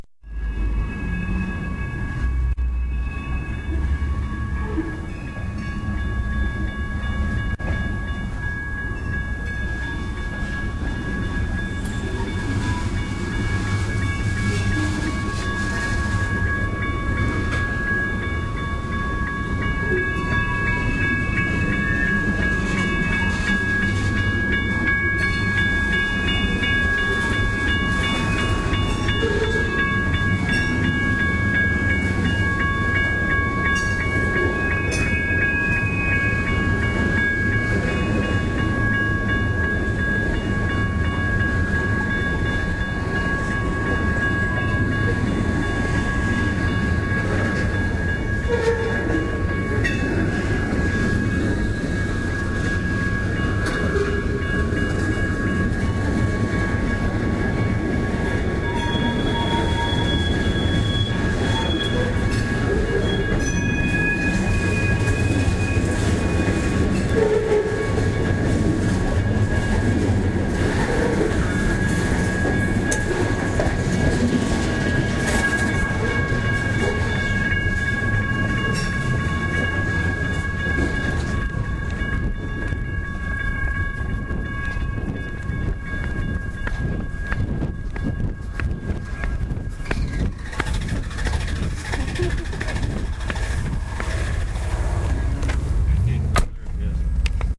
3 17 07 el paso train
this is the clanging of bells as a train passed by in el paso, texas.
bell, clang, train